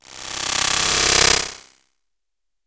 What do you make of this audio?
Electronic musquitos C1
This sample is part of the "K5005 multisample 18 Electronic mosquitoes"
sample pack. It is a multisample to import into your favorite sampler.
It is an experimental noisy sound of artificial mosquitoes. In the
sample pack there are 16 samples evenly spread across 5 octaves (C1
till C6). The note in the sample name (C, E or G#) does not indicate
the pitch of the sound. The sound was created with the K5005 ensemble
from the user library of Reaktor. After that normalizing and fades were applied within Cubase SX.
reaktor, noise, multisample, mosquitoes